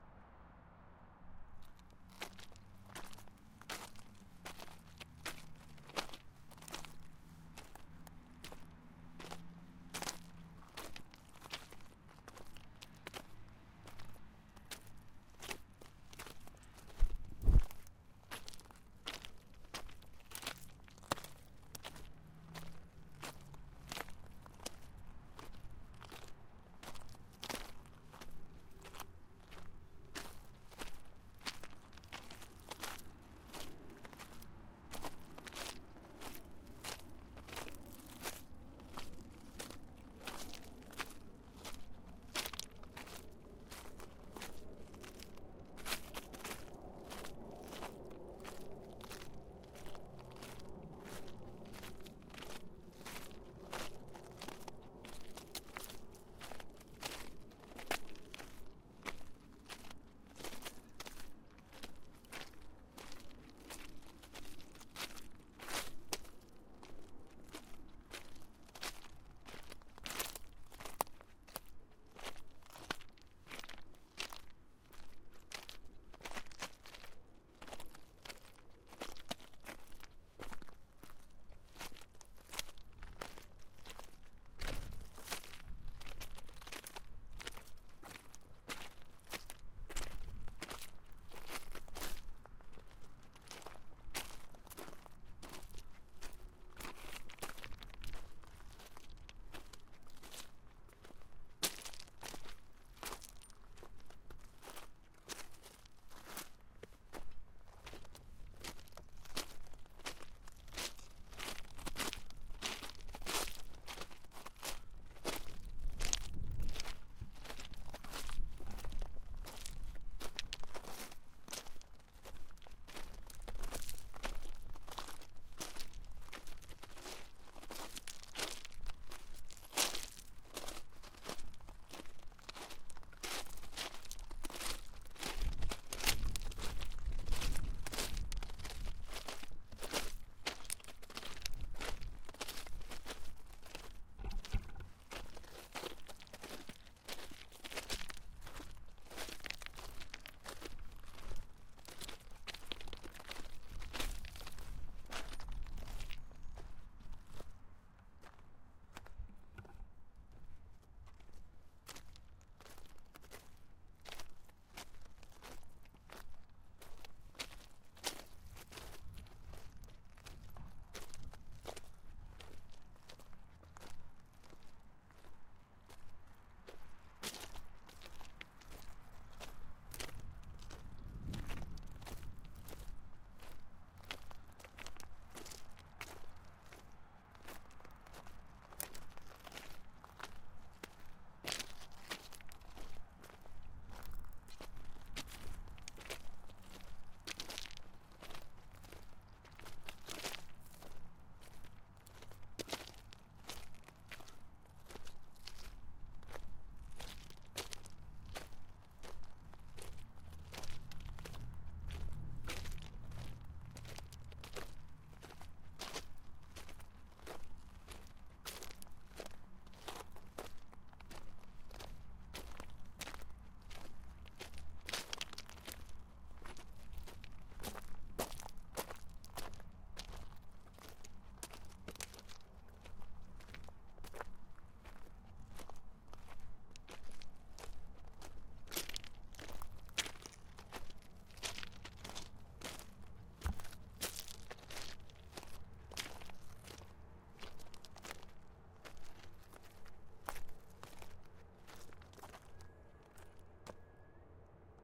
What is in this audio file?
Footsteps on Gravel Beside Railroad Track
recorded on a Sony PCM D50
XY pattern